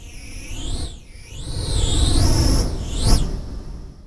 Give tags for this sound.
space; sfx; synth; whoosh; micron; flyby

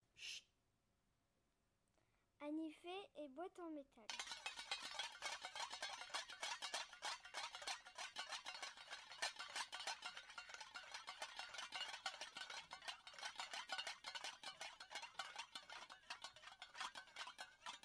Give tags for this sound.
France; messac

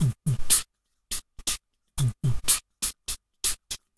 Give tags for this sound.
120-bpm; beatbox; Dare-19; loop; noise-gate; rhythm

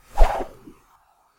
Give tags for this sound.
wind; cable; noise; rope; lasso; whip

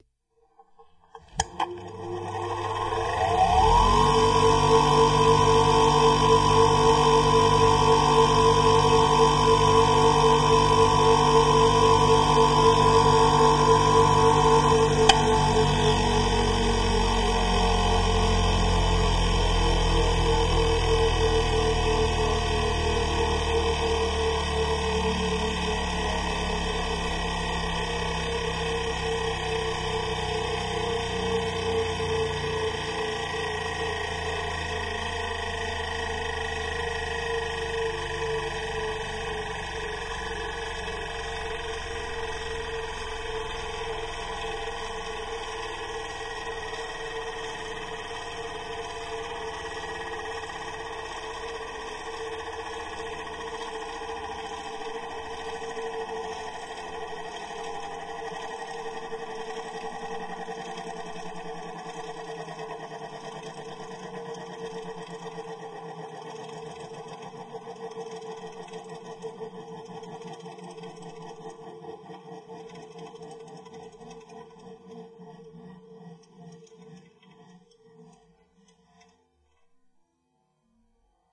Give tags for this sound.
grinder; machine; sound-effects; mechanical; tools

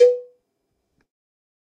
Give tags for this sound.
cowbell; drum; god; kit; more; pack; real

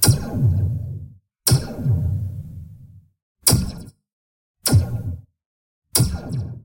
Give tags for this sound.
aggression; army; attack; electronic; fight; gun; laser; laser-shot; laser-sound; military; pistol; shooting; shot; shotgun; space; space-invaders; space-wars; star-wars; war; weapon

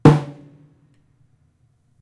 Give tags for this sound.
kit; drum; tom

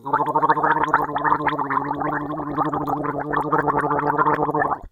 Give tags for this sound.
ill; health; air; cold; gargle

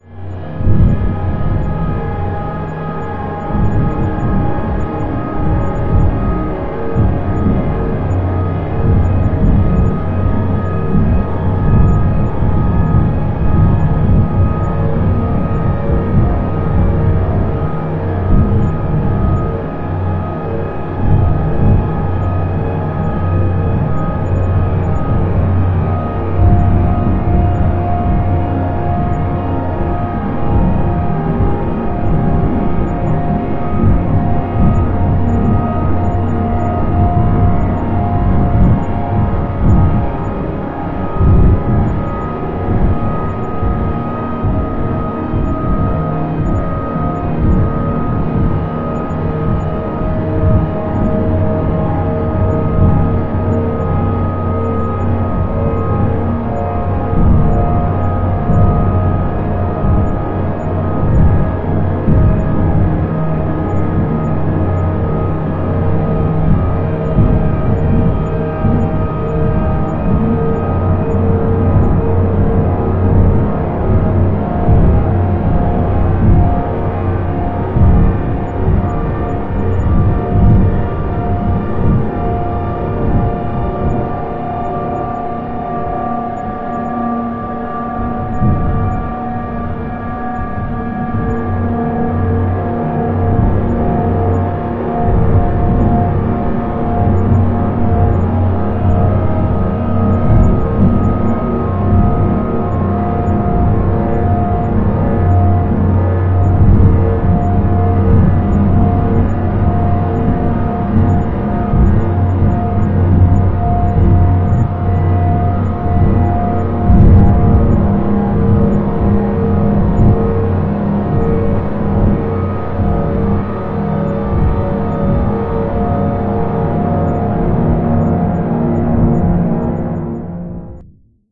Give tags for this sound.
Dark; Suspense

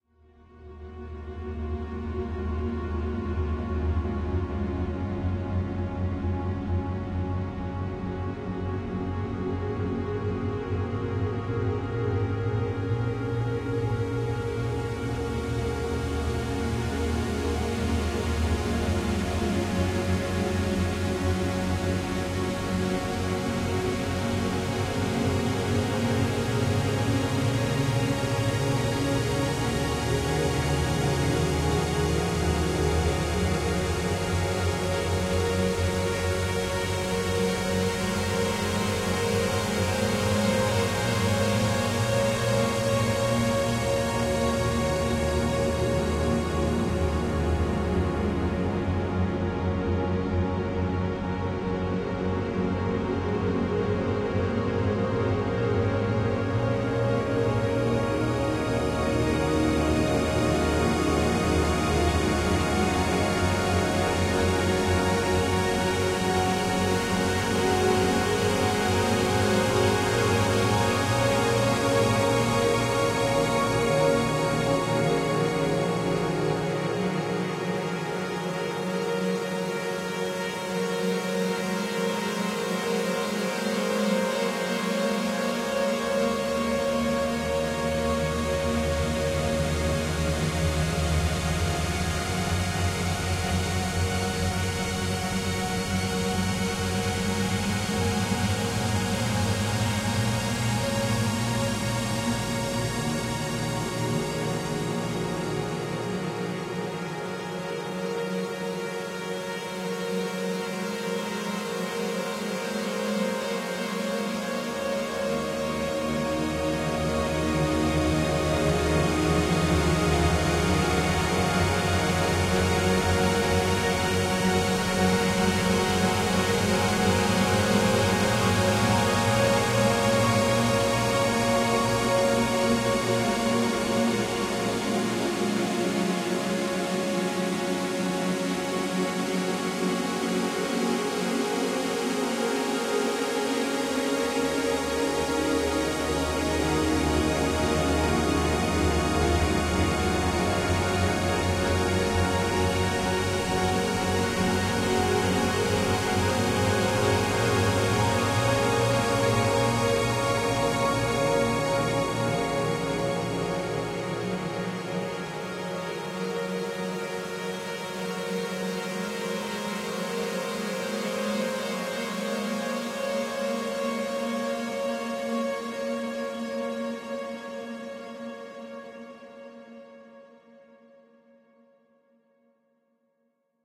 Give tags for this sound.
emotion; floating; stereo; symphonic; atmospheric; ethereal; experimental; blurred; synthetic-atmospheres

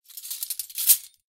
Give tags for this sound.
metal; jingle; cutlery

